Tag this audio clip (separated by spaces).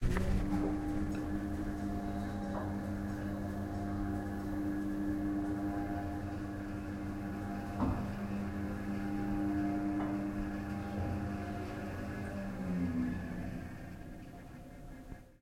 elevator door field-recording